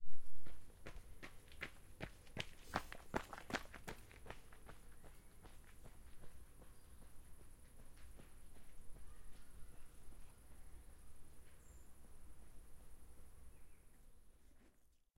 Jogger gravel running sport suburban park
gravel, suburban, Jogger, park, sport, running